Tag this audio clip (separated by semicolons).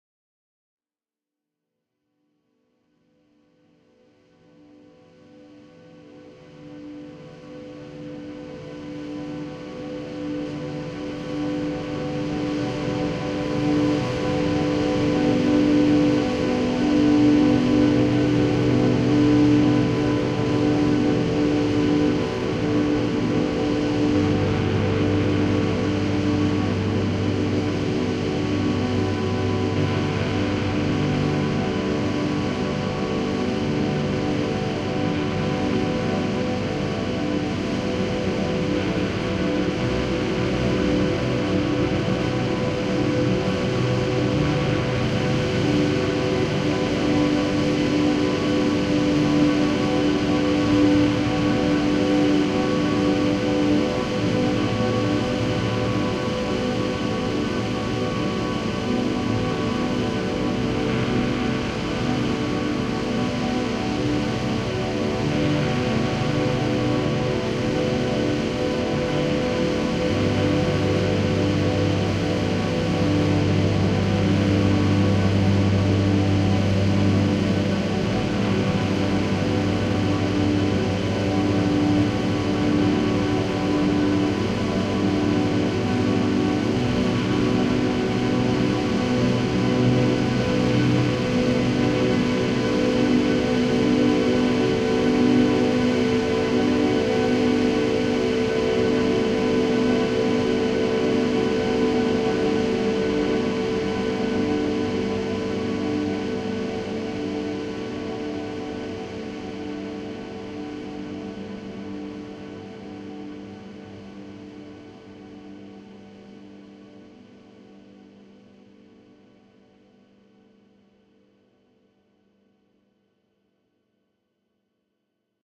ambient; distortion; dreamy; drone; guitar; pad; shoegaze; texture